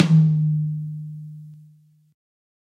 High Tom Of God Wet 010
drumset, high, drum, realistic, kit, set, tom, pack